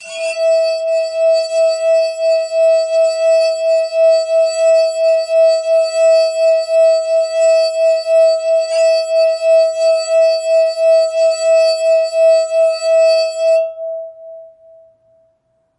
A glass filled with water to closely pitch match an E4 on the piano